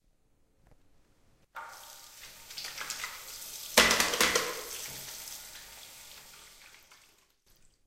washing hands
Sound of someone washing his hands in a bathroom sink. Recorded with a Zoom H2. Recorded on a Campus Upf bathroom.
bathroom, campus-upf, flushing, soap, UPF-CS13, water